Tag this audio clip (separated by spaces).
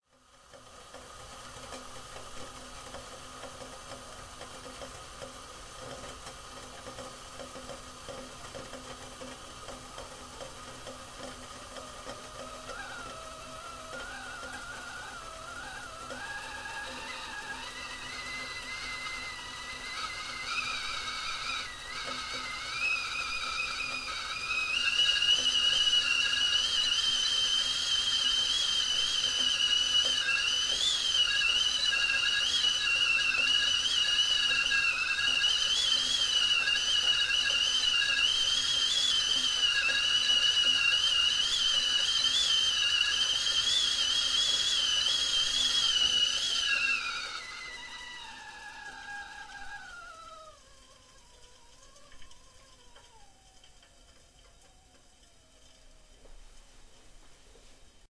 gas hob kitchen steam